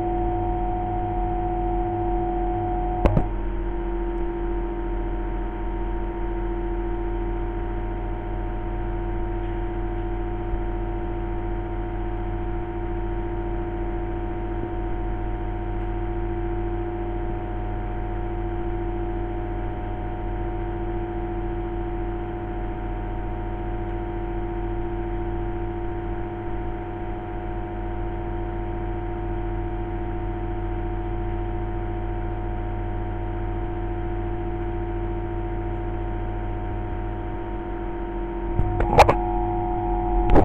Solder extraction fan power cycling
Drone of an extraction fan. Some clicks of the power switch can be heard.
piezo-mic, contact-mic, electronics